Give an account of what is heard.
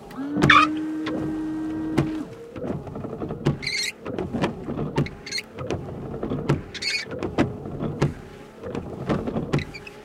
New car windshield wiping sounds. With some water cleaning spray. Squeaky.
Recorded with Edirol R-1 & Sennheiser ME66.